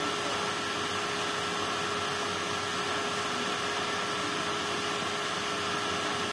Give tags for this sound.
computers heat laptop

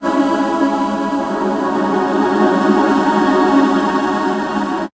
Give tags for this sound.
choir pad processed

